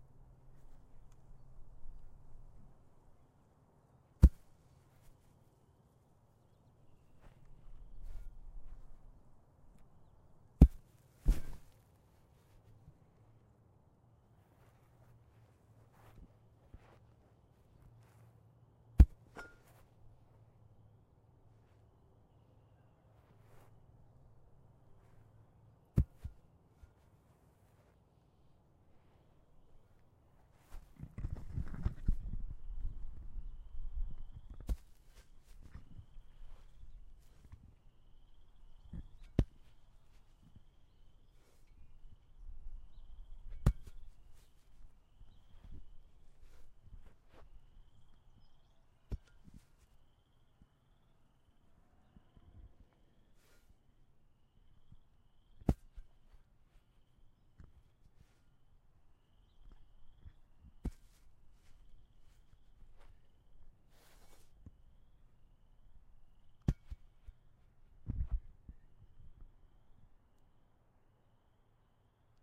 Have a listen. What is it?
CINDER BLOCK THUD IN GRASS
Sounds of a heavy battery falling onto the grass. You can really hear the deep bass thud.
falling, hitting, heavy, fall, cinder-block, heavy-object, Thud, Thumb